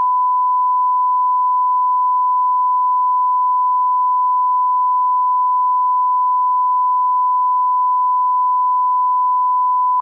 1000hz sine wave sound